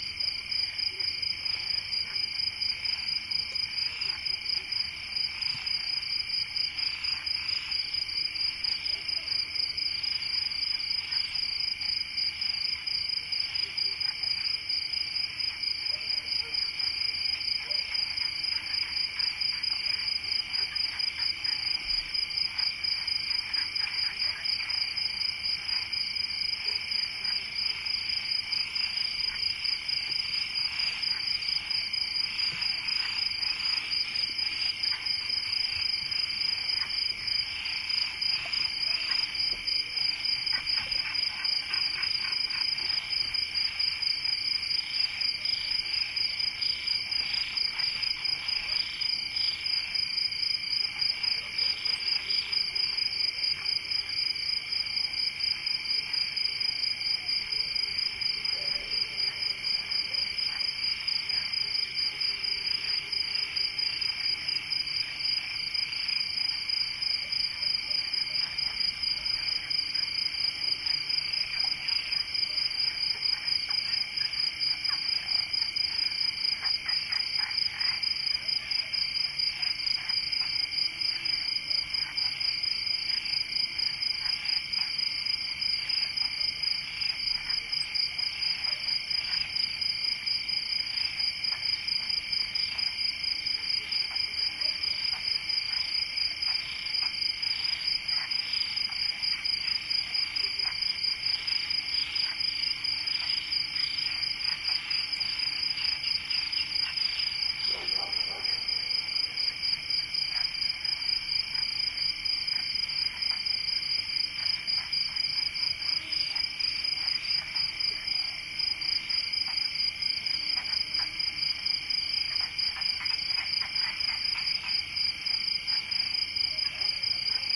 20060706.night.channel02
Night recording of ambiance at some distance of a channel in summer. You can hear the cricket chorus, frogs, and some dog barking. This sample is cleaner than channel01. Again, if you have the time, have a look at the spectral view of this sample: the night is full of sounds we cannot hear. Rode NT4, Shure FP24, iRiver H120(rockboxed). Near El Rocio, Huelva (Spain)